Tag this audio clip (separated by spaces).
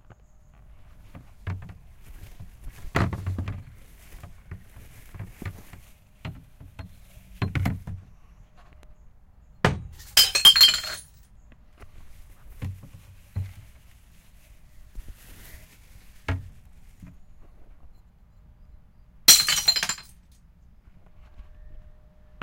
glass field-recording fall jars